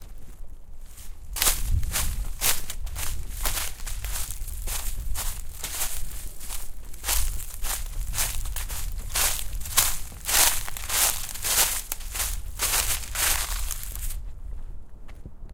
FX Footsteps Leaves 01
walk, footstep, foot, footsteps, step, feet, foley, shoe, walking, steps